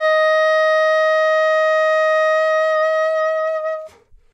Alto Sax eb4 v63
The second sample in the series. The format is ready to use in sampletank but obviously can be imported to other samplers. This sax is slightly smoother and warmer than the previous one. The collection includes multiple articulations for a realistic performance.
alto-sax, jazz, sampled-instruments, sax, saxophone, vst, woodwind